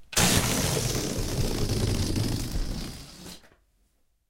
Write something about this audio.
Balloon-Deflate-02
Balloon deflating. Recorded with Zoom H4
balloon, deflate